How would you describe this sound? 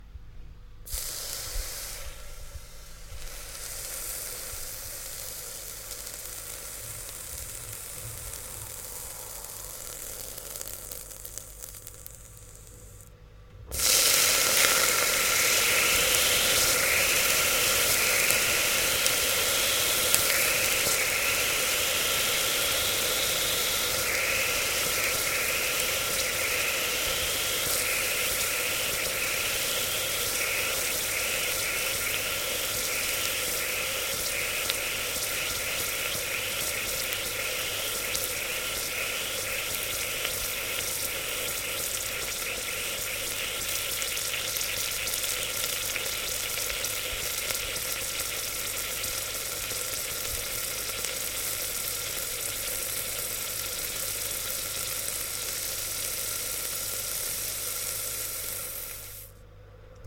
Water evaporating once dropped onto a hot pan - take 2.
steam, evaporate, ice, kitchen, hiss, water